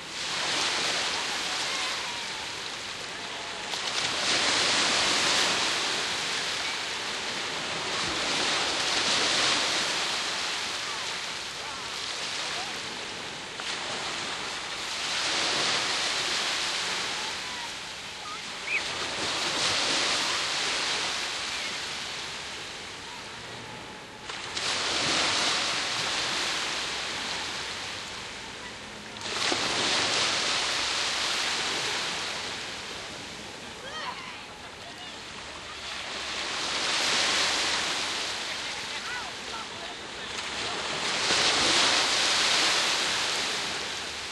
This is the sound of Weymouth beach approaching dusk, recording the sea gently encroaching further inward.